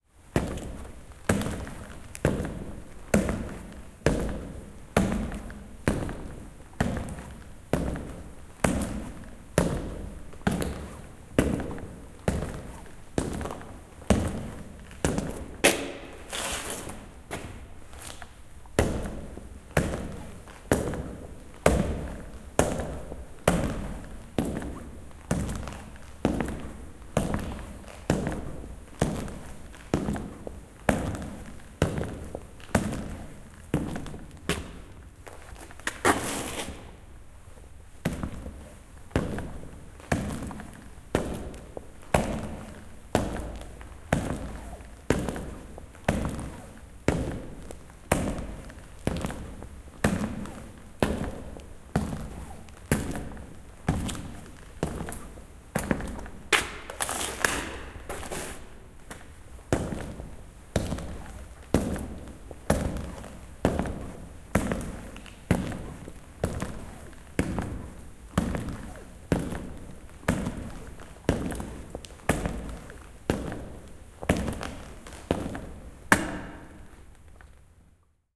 Askeleet salissa / Echoing steps in a hall back and forth, boots with metal heels, stony floor
Mies kävelee rauhallisesti edestakaisin nahkasaappailla, metallikannat, linnan kaikuvan salin kivilattialla. Välillä kääntymiset.
Paikka/Place: Suomi / Finland / Hämeenlinna
Aika/Date: 25.11.1991